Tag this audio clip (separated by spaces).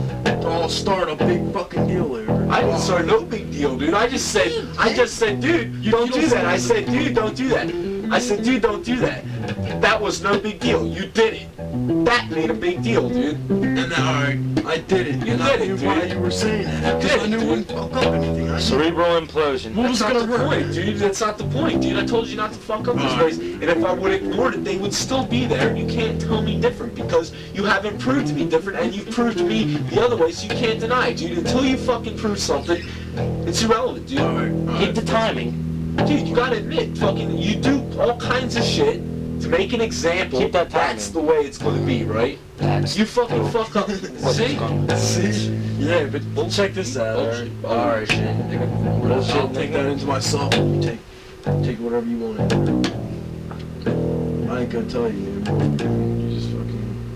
angry argument deadman erny human lofi voice